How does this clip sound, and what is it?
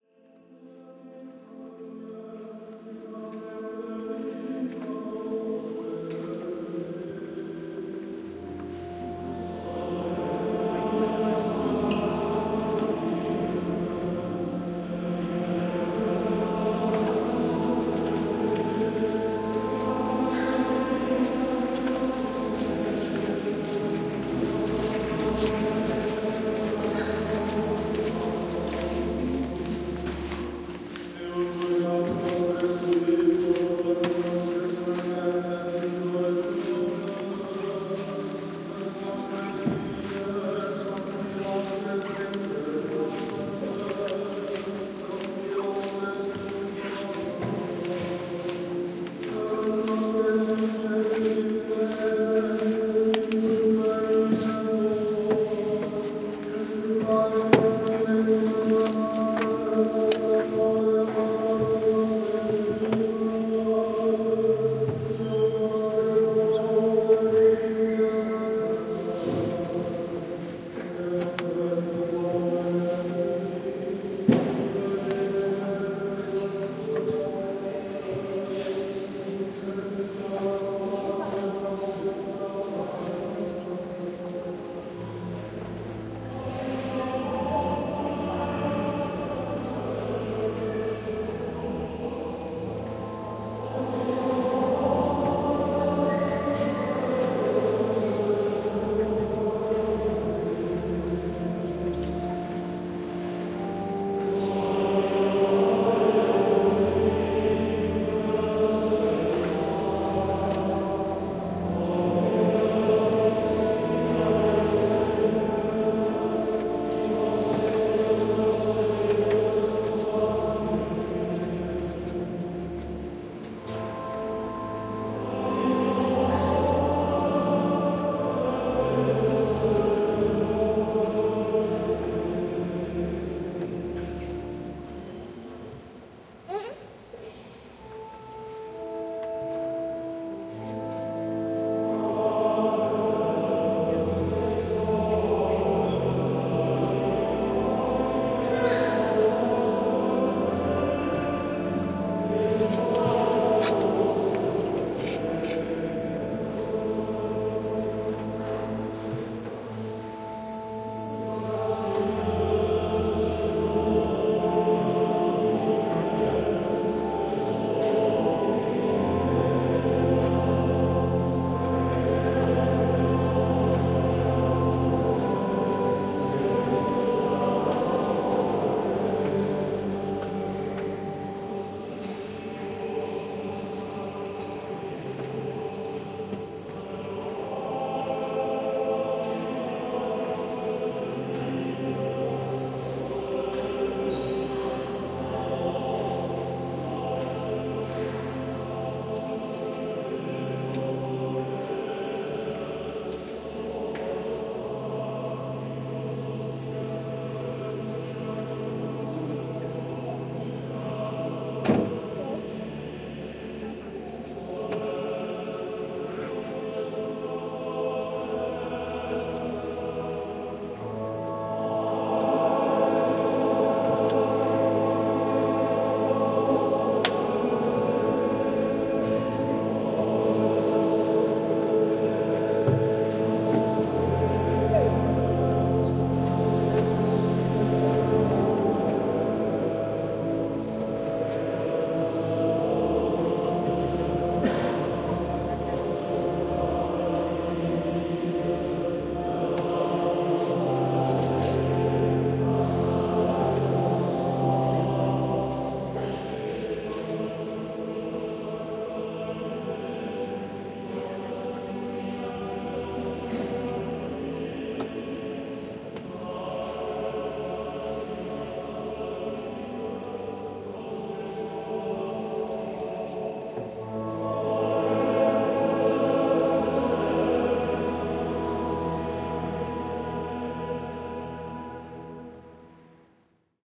21 08 08-19 00-Coro monjes montserrat
A very nice present to all the visitors of the Moreneta’s Monatery: a choir of fifteen monks singing with a little church organ. Their voices, very good synchronized, solemn, filled sound, and we can’t distinguish the real ones, from the reverberated ones. From time to time, some tourists move around making noises: clothes brushing, some voices, babies crying, coughs, some camera flashing, hits at the stairs, some door opening… But at the end, and above all this kind of noises, the solemn sing of the monks wins the battle and all we hear is that king of lullaby that wrap us up and fills the soundscape.
barcelona, monks, montserrat, singing, spain